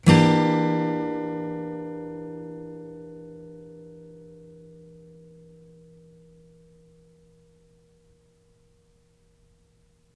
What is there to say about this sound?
chord Gm6
Yamaha acoustic through USB microphone to laptop. Chords strummed with a metal pick. File name indicates chord.
acoustic, chord